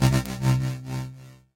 sound of my yamaha CS40M analogue